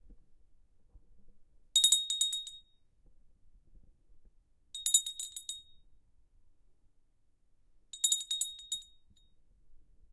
Ringing of a small bell